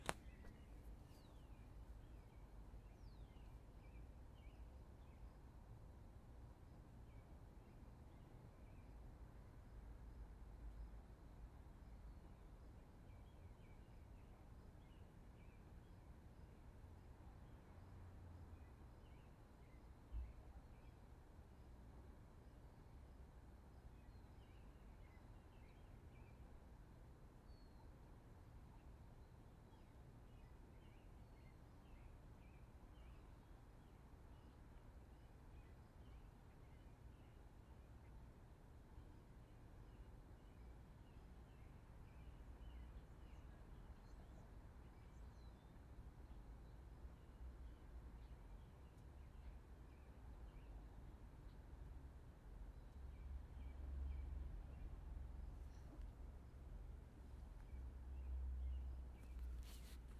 Birds Chirping
Some very faint bird chirps with the sound of cars in the distance. If you listen very closely, you can hear a dog. Used in the set of Rivers of the Mind Season 2 Episode 9. Not sure where I took this, but I recorded it on my phone.